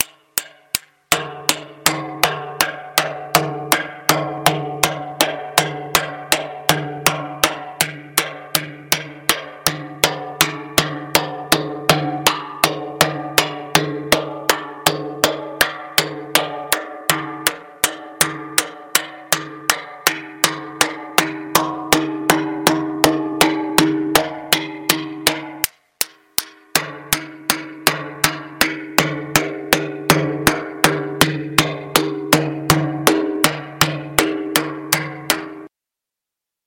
A sound sequence captured from different points of my physical model and different axes. Some post-processing (dynamic compression) may present.
finite-element-method, synthesis, weird